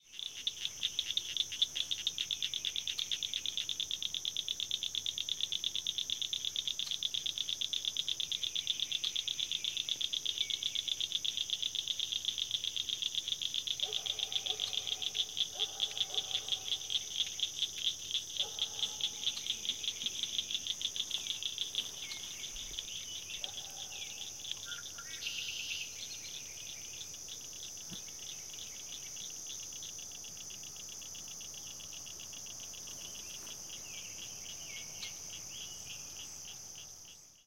A recording made in July around 7:00 at night near a huge man-made lake. The ever-present insects are there, but overshadowed by the peepers. 13 seconds in a dog barks off in the distance and the echo is just incredible. 24 seconds into the recording is the classic call of the Red-Wing blackbird. Made with Samson C0-2 stereo microphones feeding a Zoom H4N recorder.
ambience, field-recording, insects, nature, peaceful, peepers, Red-wing-blackbird, Summer